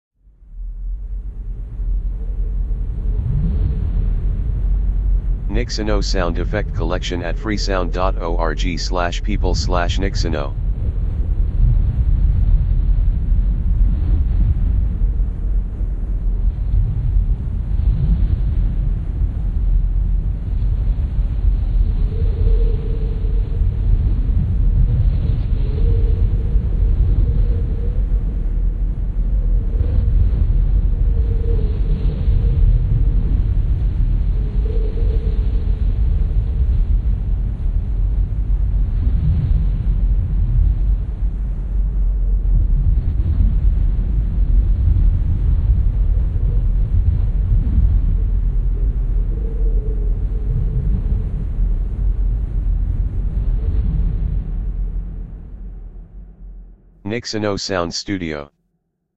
horror windy ambiance
2 windy ambiance recorded by Blue Spark and Steinberg UR22 + white noise and 3 plugin
bogey, atmos, cinematic, amb, atmo, fx, ambiance, terrifying, creepy, movie-fx, wind, ambient, background-sound, Gothic, haunted, soundscape, general-noise, terror, atmosphere, windy, background, movie, atmospheric, weird, horror, ambience, white-noise